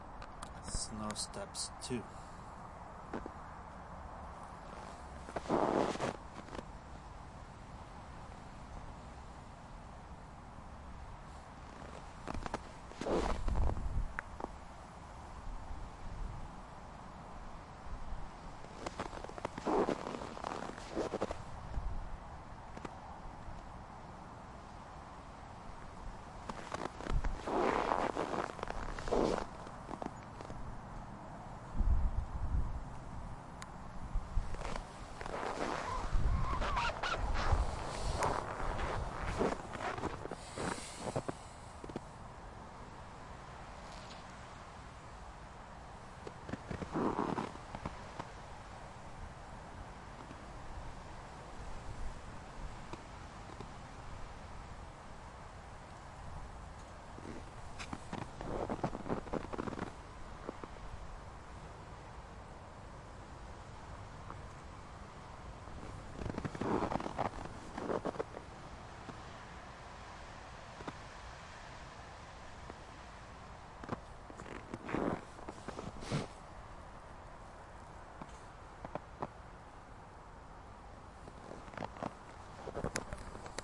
slow steps on snow
recorded on zoom recorder, Calgary Alberta, Canada. Wind and slow steps on deep snow.